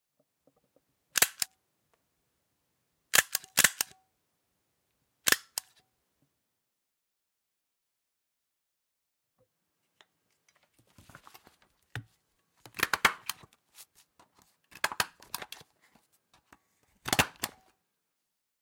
Staplering papers and Stapler sounds.